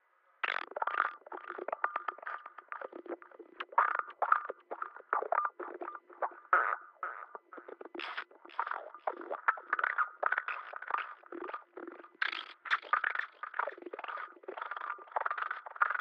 sounds with my mouth like an alien after the edition with Logic